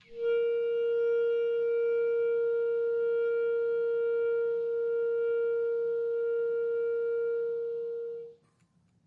One-shot from Versilian Studios Chamber Orchestra 2: Community Edition sampling project.
Instrument family: Woodwinds
Instrument: Clarinet
Articulation: long sustain
Note: A#4
Midi note: 70
Midi velocity (center): 20
Room type: Large Auditorium
Microphone: 2x Rode NT1-A spaced pair, 1 Royer R-101 close, 2x SDC's XY Far
Performer: Dean Coutsouridis
clarinet, long-sustain, midi-note-70, midi-velocity-20, multisample, single-note, vsco-2, woodwinds